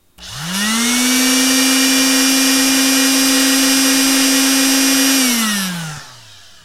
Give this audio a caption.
This is sound of portable sending machine . It's recorded with Shure sm57 mic directly into comp. Cheers